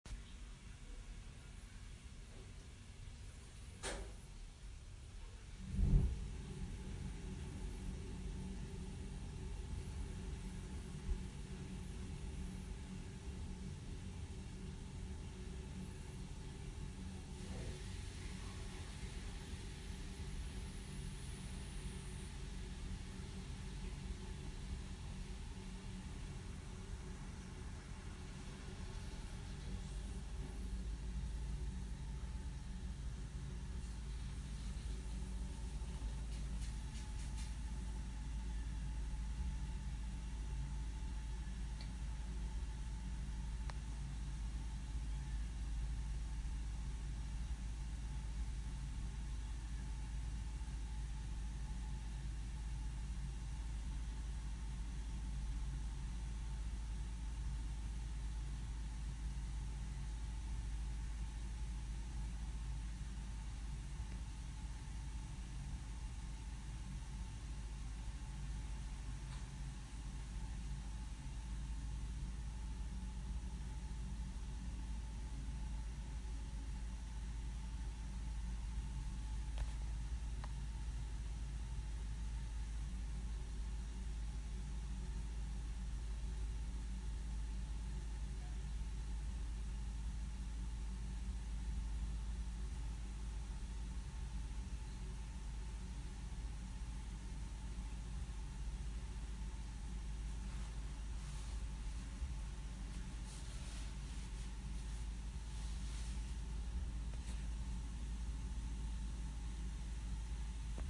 Ambient Unfinished Basement
Ambient sound from unfinished basement